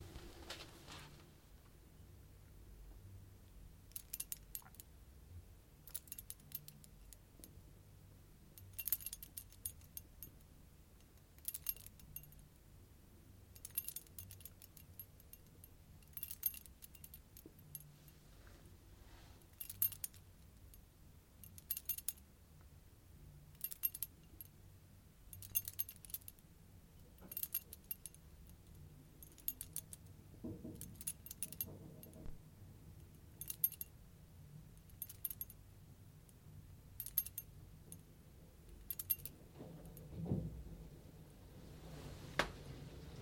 Small metal objects moving
The sound of small metal objects clanging. In this case it was made for a scene with earrings moving.